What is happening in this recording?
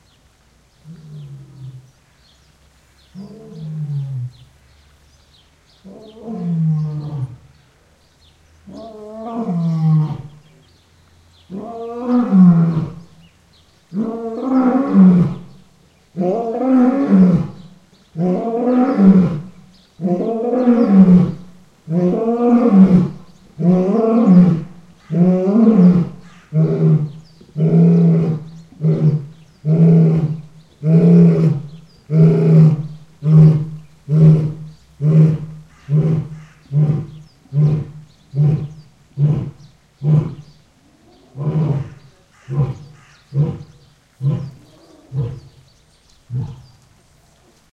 You hear a lion bellow.